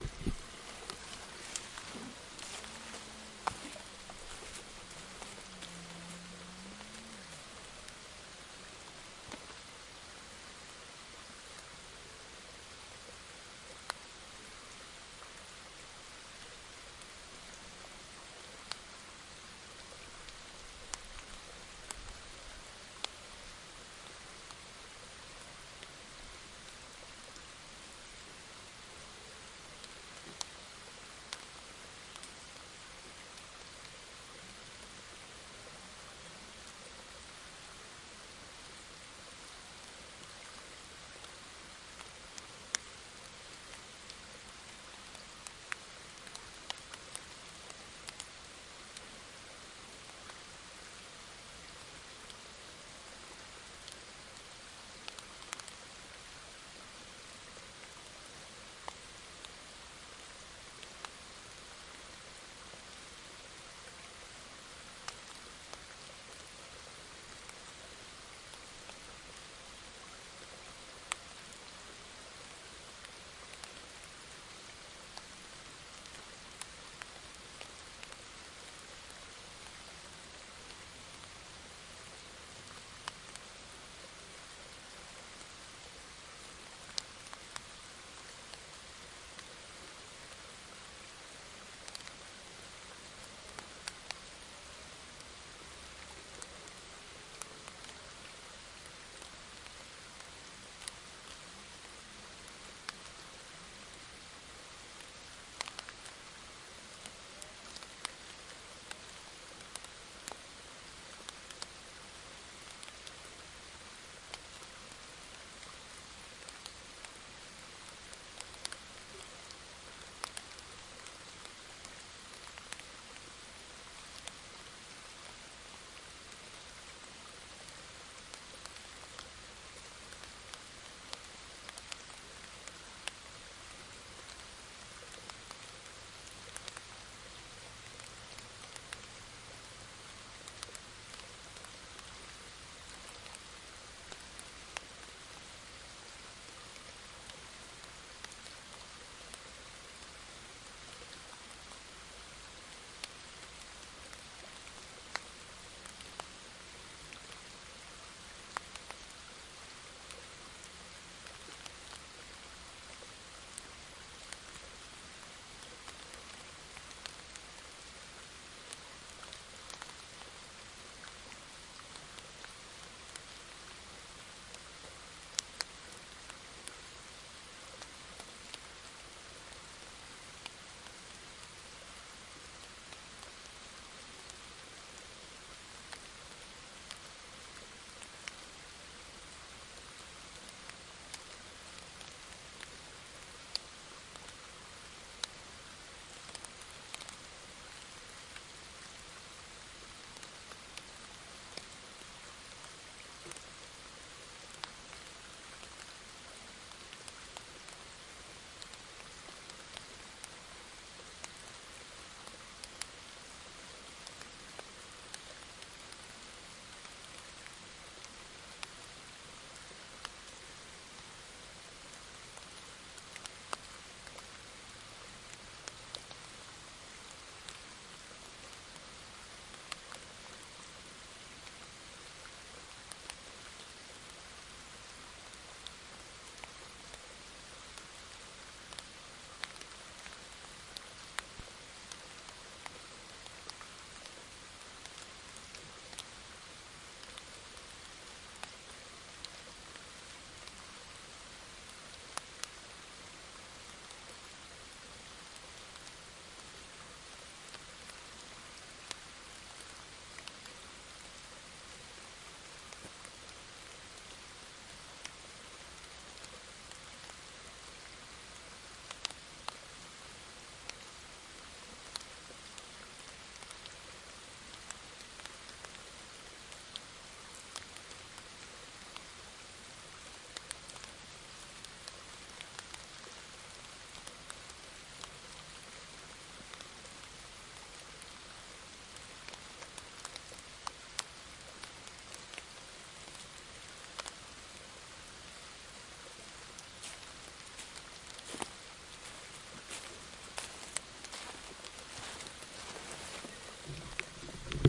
the sound of medium stream in the winter forest - rear